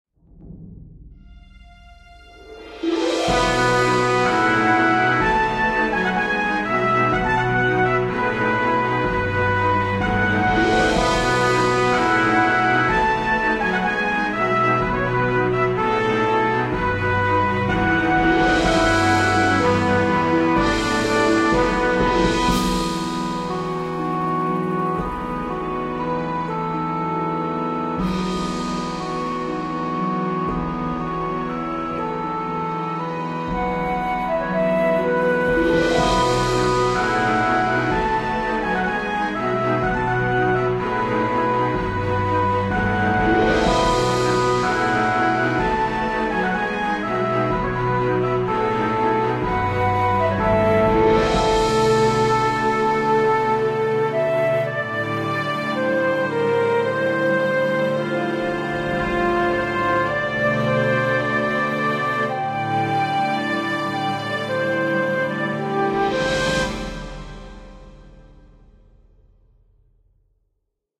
Orchestral adventure theme featuring full orchestra instrumentation.
Hifi, suitable for professional projects.
action, adventure, adventurous, cello, cinematic, danger, dramatic, drums, epic, film, flute, happy, horns, intro, joy, melodic, melody, movie, music, orchestra, orchestral, quality, song, soundtrack, strings, suspense, theme, viola, violin